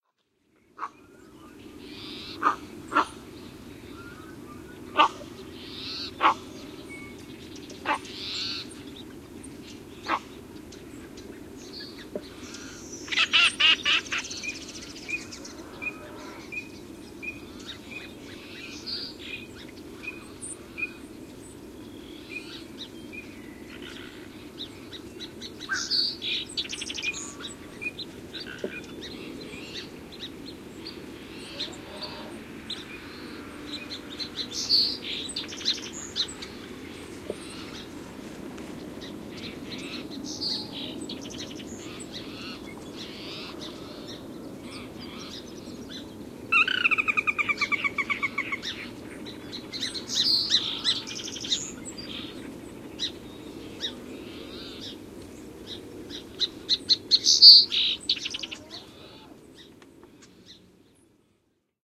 ag21jan2011t12
Recorded January 21st, 2011, just after sunset. Opens with a startled Fulvous Whistling Duck, a cameo by an American Coot about two thirds in.